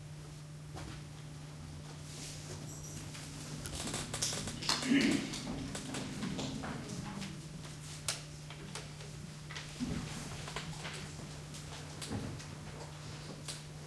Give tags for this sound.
silence
ambient

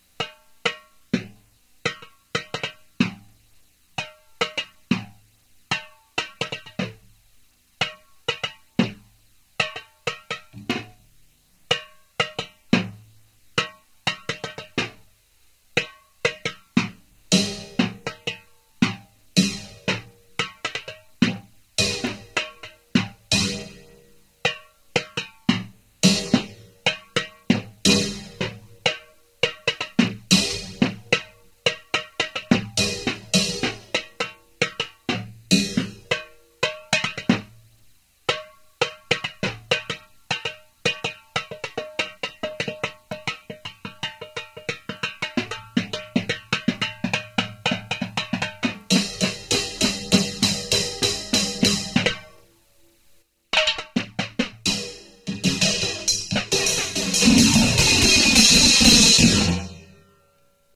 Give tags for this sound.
drum
diy
homemade
beat

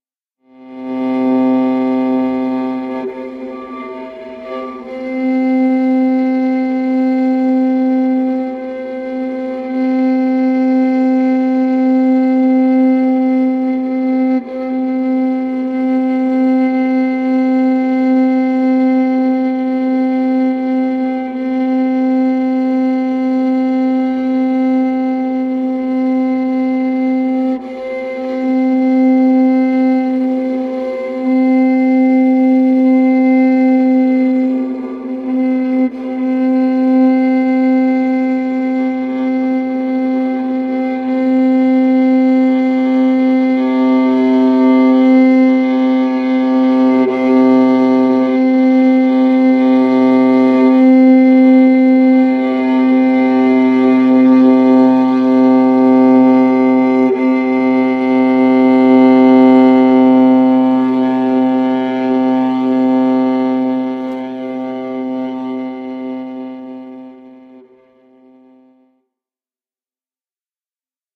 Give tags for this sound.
effects
strings
viola

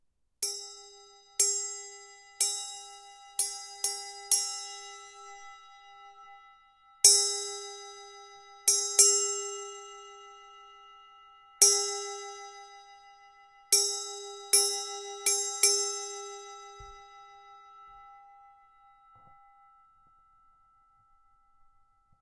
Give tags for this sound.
Sound
Korea
Nottbowl

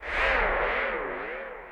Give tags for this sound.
audio
effext
vicces
fx
beat
jungle
sfx
game

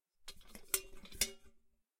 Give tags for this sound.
metallic
handle
metal
swinging
pail
swing
hinges
pick-up
hinge
movement
lift
bucket

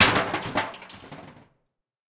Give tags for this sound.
bin
bottle
c42
c617
can
chaos
coke
container
crash
crush
cup
destroy
destruction
dispose
drop
empty
garbage
half
hit
impact
josephson
metal
metallic
npng
pail
plastic
rubbish
smash
speed
thud

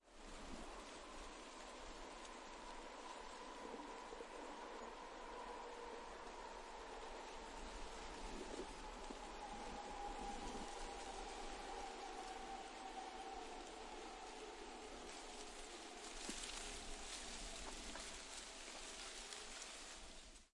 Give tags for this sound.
field-recording
forest
leaves
nature
rustling-branches
tree
trees
wind

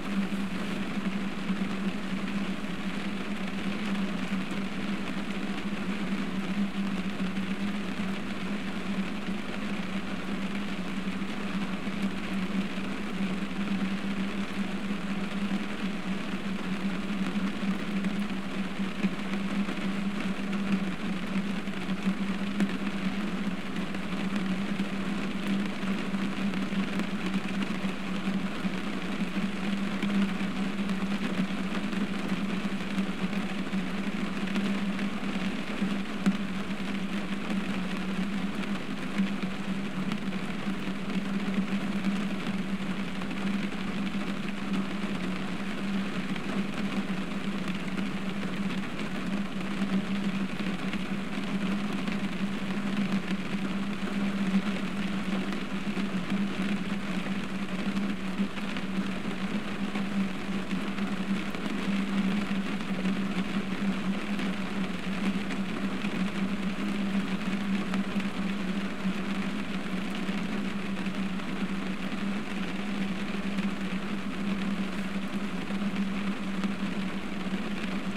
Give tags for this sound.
Spring
Nature
Storm
field-recording
Buffalo

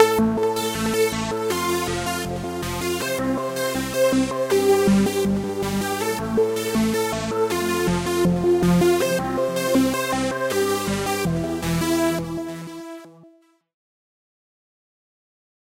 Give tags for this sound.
Synthesizer
Electronic
Rave
Techno
Melodic

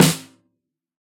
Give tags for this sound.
drum
velocity
multisample
snare
1-shot

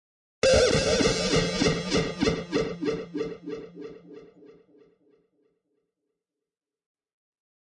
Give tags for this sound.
african
delay
dub
echo
electric-guitar
F5
free
gangsta
gmortiz
guitar
hip-hop
rap
reggea
reverb
rock
slide
sound
tone

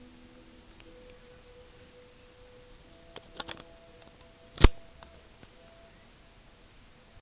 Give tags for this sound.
connect
soundeffect
wii

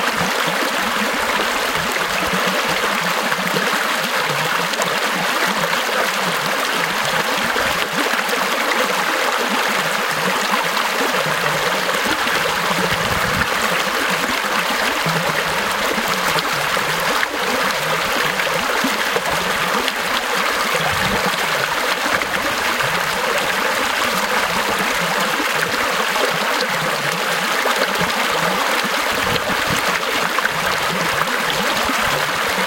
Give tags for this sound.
ambient
babbling
babbling-brook
brook
burn
countryside
creek
field-recording
gurgle
gurgling-brook
nature
river
stream
trickle
trickling-water
water

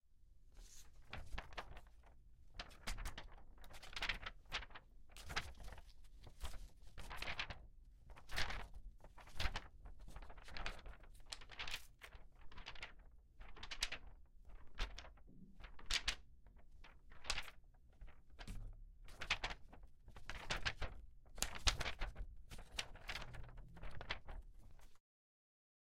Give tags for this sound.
Leaf
Paper
Movement

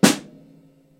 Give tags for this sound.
drums
snare-drum
drumset
sample
snare
Samson-C03
dataset

